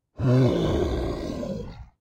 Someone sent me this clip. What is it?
Deep Growl 1
Deep Growl Creature Monster